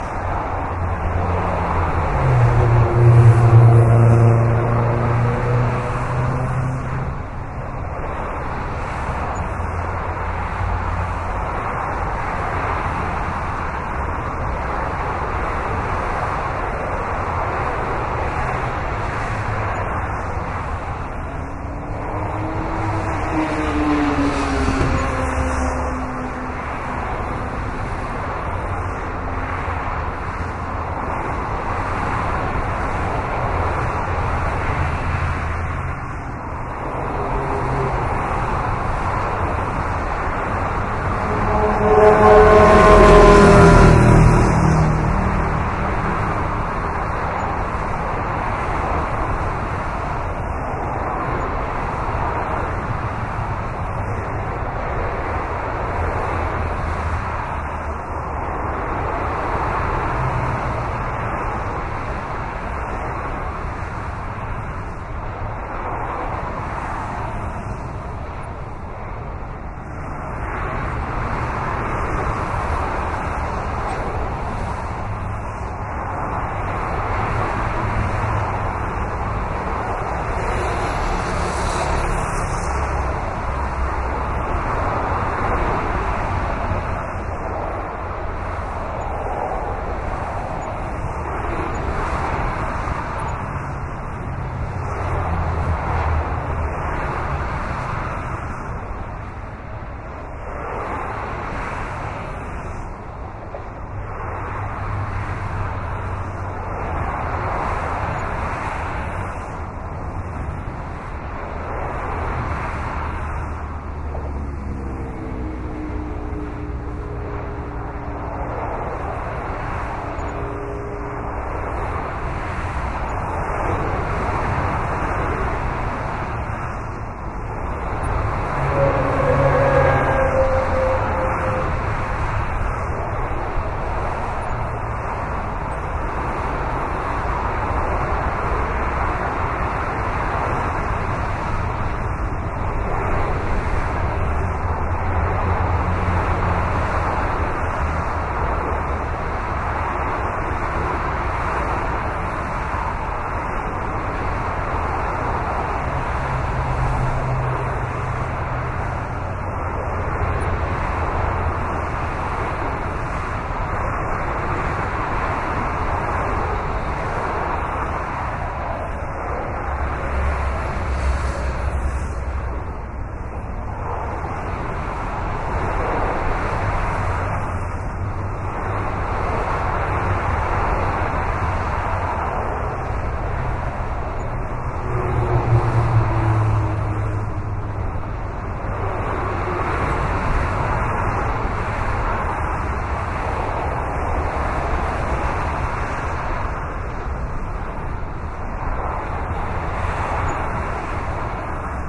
Dutch highway near Utrecht recorded in 2011
utrecht, field-recording